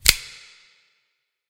Knife Snickt (Reverb)
A spring assist knife opening with some reverb added. Used as a sound cue in a production of Annie.
snickt, snap, knife, switch-blade, unearthly, dreamlike, reverb, crack, switchblade, knife-opening